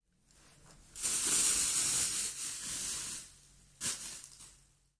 Plastic Bag
Primo, bag, plastic, LM49990, EM172